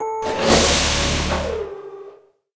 sas ouverture
airlock, machine, open, science-fiction, sci-fi